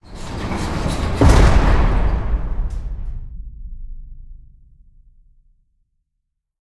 A cinematic sound of someone being locked into a jail cell. I used it with no actual video - it was between two scenes with just black.
boom, cell, cinematic, close, closing, door, jail, prison